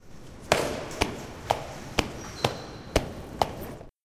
This sound is shoe footsteps. It has been recorded with the Zoom Handy Recorder H2 very closely to the sound source. It has been recorded in the hall of the Tallers building in the Pompeu Fabra University, Barcelona. Edited with Audacity by adding a fade-in and a fade-out.
campus-upf, corridor, floor, foot, footsteps, hall, shoe, stairs, steps, tallers, university, UPF-CS14, walk, walking